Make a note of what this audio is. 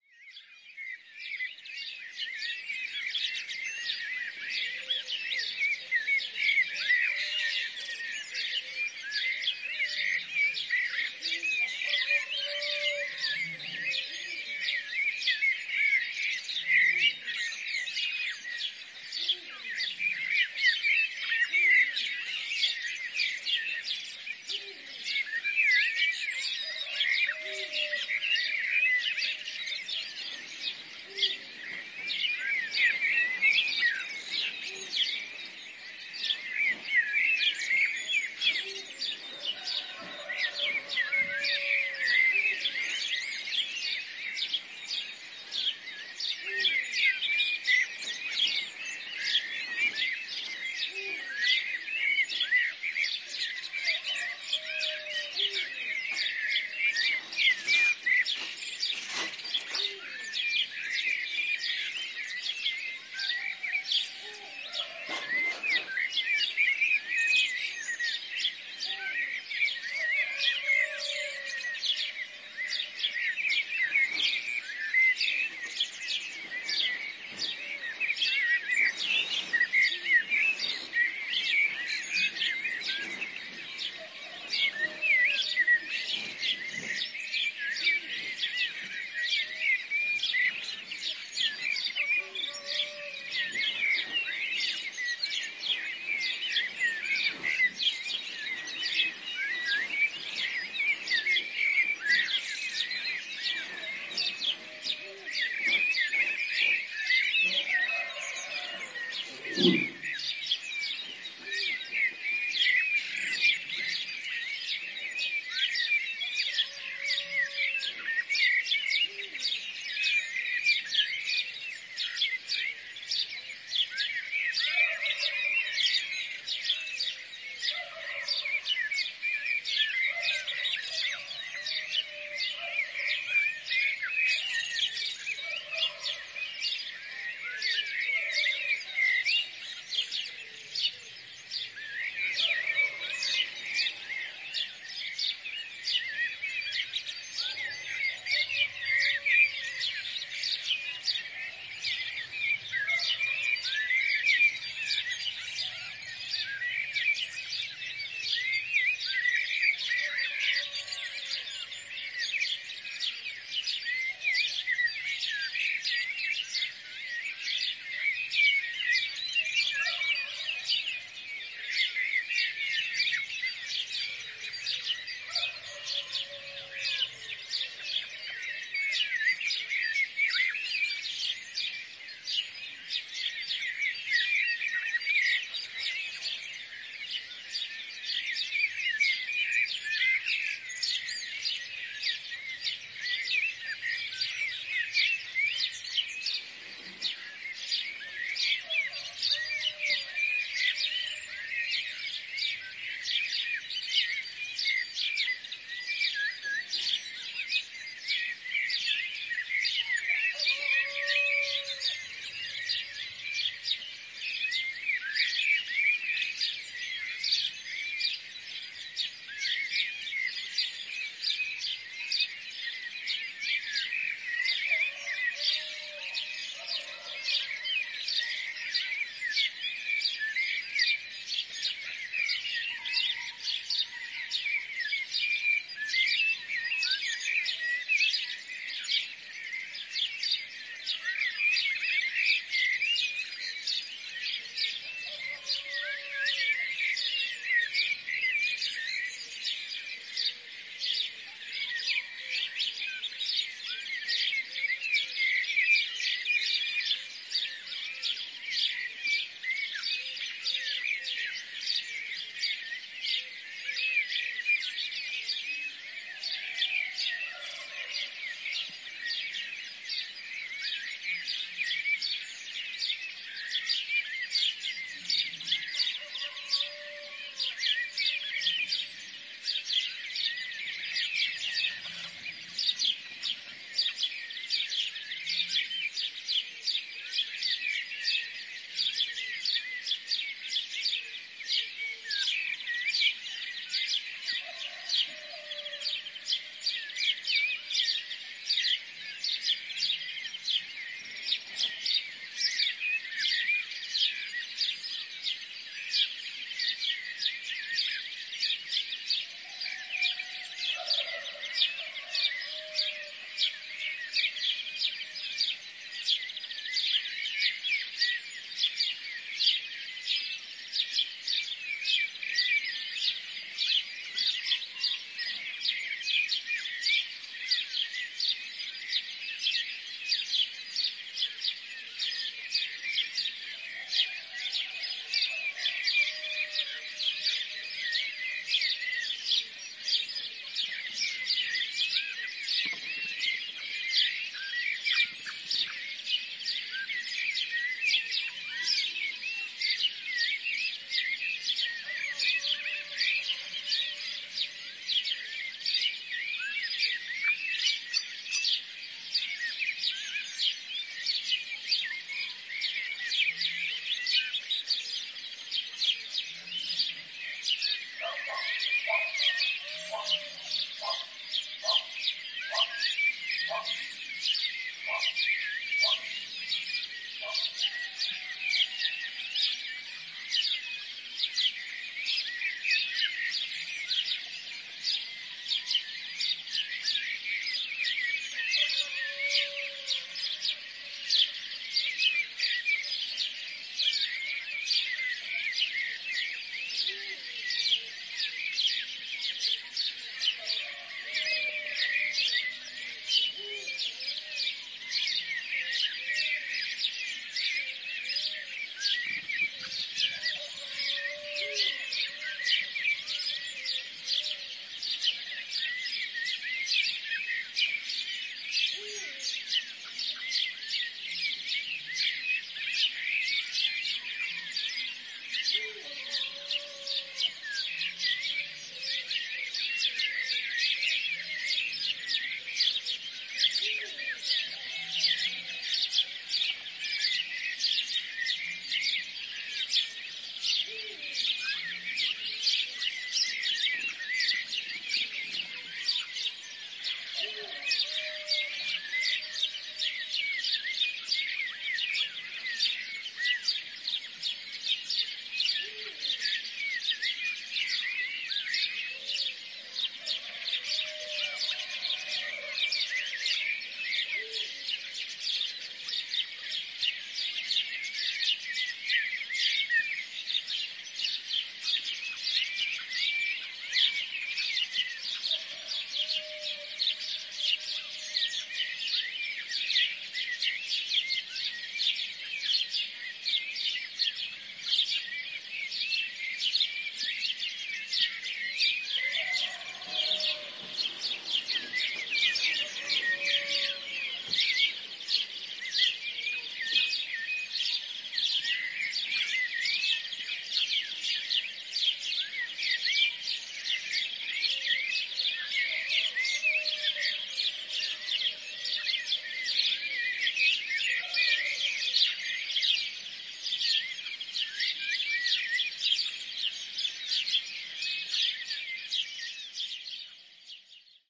20150403 01.dawn.chorus.with.EagleOwl
Dawn chorus recorded from a country house's window, House Sparrows chirping, Starling, Black bird and Eagle Owl callings, sheep bells, barking dogs, along with some noises from the inside of the room. The Eagle Owl is heard better at the beginning and the end. Primo EM172 capsules inside widscreens, FEL Microphone Amplifier BMA2, PCM-M10 recorder. Recorded in a country house near Carcabuey, Cordoba province (Andalusia, S Spain)
ambiance, Bee-eater, birds, Black-bird, country, dawn, Eagle-owl, farm, field-recording, Peacock, Rooster, Spain, spring, Starling